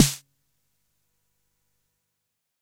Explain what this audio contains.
various hits 1 019
Snares from a Jomox Xbase09 recorded with a Millenia STT1
909, drum, jomox, snare, xbase09